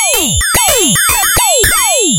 110 bpm FM Rhythm -55
A rhythmic loop created with an ensemble from the Reaktor
User Library. This loop has a nice electro feel and the typical higher
frequency bell like content of frequency modulation. Experimental loop.
Mostly high frequencies. The tempo is 110 bpm and it lasts 1 measure 4/4. Mastered within Cubase SX and Wavelab using several plugins.
rhythmic, 110-bpm, fm, loop, electronic